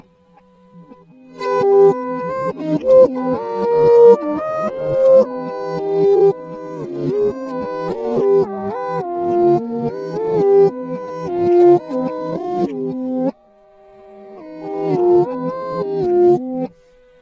Acoustic guitar horror
Freaky guitar sound fx inspired by Amon Tobin. I can't play guitar at all, so I tried to make things interesting by reversing the umpteenth failed take I shot and pitching things around with Melodyne.
acoustic, guitar, sad-clowns, pitched, amon-tobin, horror, deadly, freaky